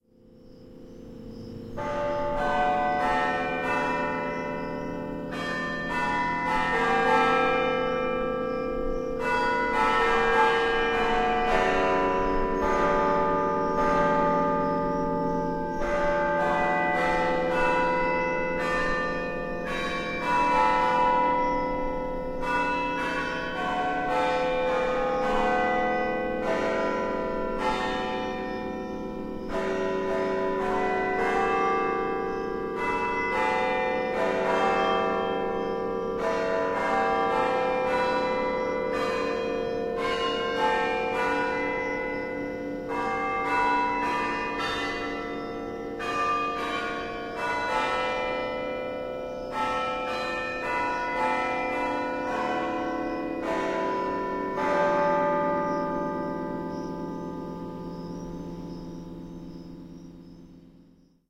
bells jerusalem
Evening recording with ambient crickets and other background noises.
ambient, bells, carillon, church, field-recording